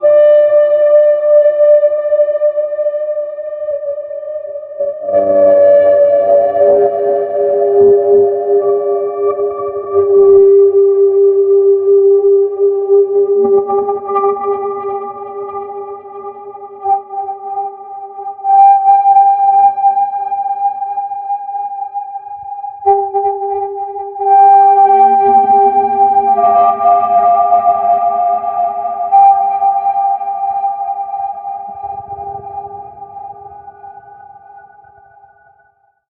A samurai at your jugular! Weird sound effects I made that you can have, too.